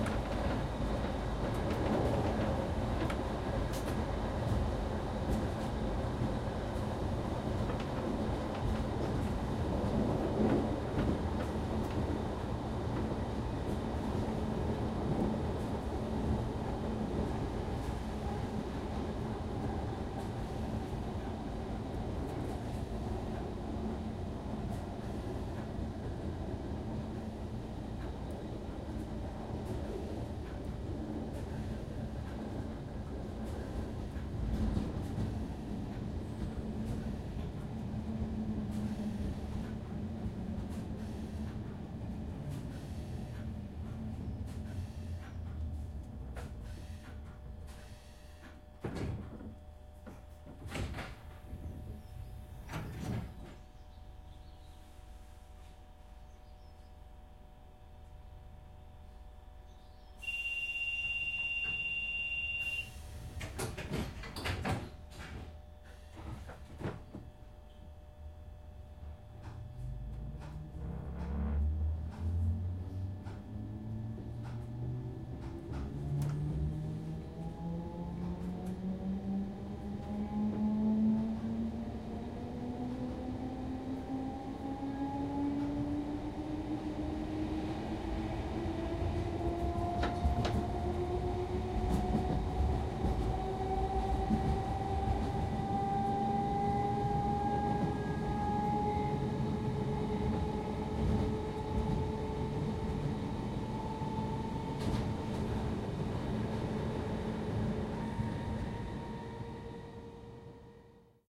Tube - London - Train - Interior - Slow Down & Stop - Doors
London, Tube, Train, Underground